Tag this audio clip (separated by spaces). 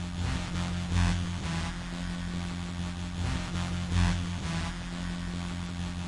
Arp buzzfuzz deep